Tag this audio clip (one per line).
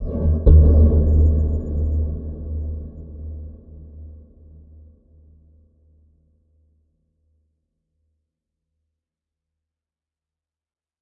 sinister
terror
scary
background-sound
Gothic
anxious
thrill
haunted
spooky
terrifying
bogey
creepy
suspense
drama
atmos
phantom
dramatic
weird
atmosphere